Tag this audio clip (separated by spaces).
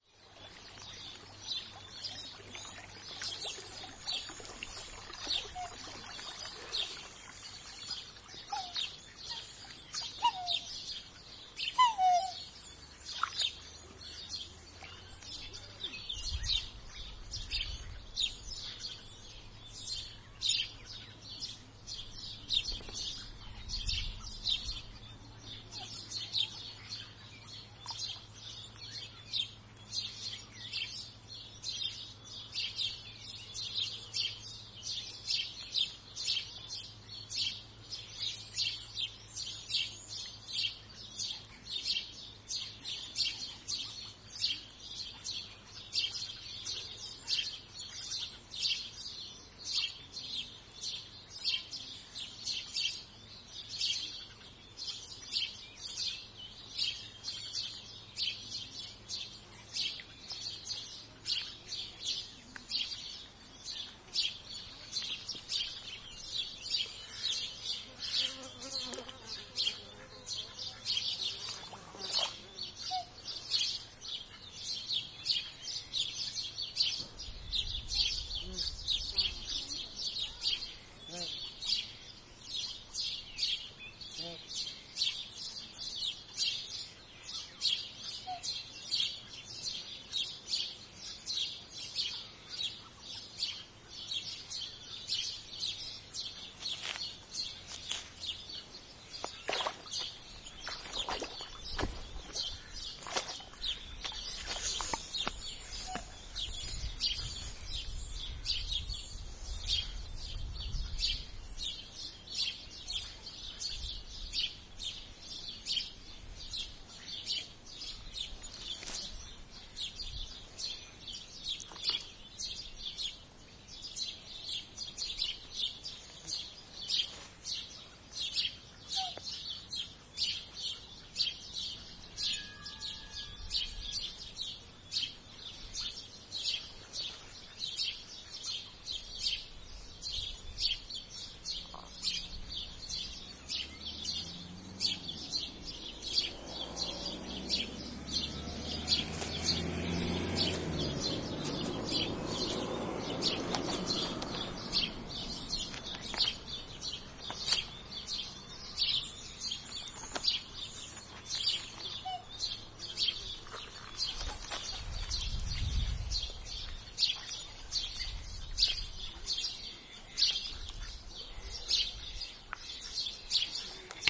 ambience countryside farmland field-recording france summer